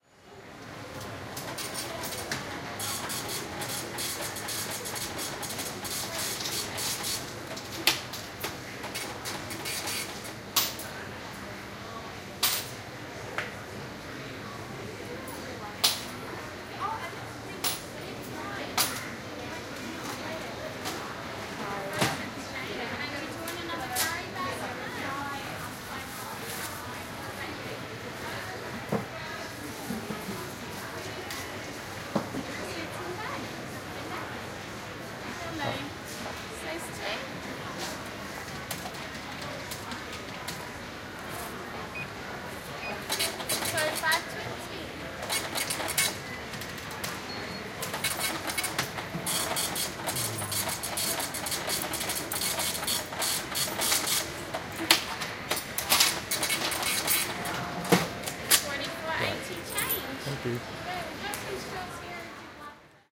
At The Cash Register
Buying soft drinks in a department store. You can hear the register printing and beeping.
binaural, cash-register, change, checkout, printer, purchase, store